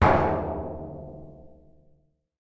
Single hit on a small barrel using a drum stick. Recorded with zoom H4.
single-hit, percussive, barrel, metal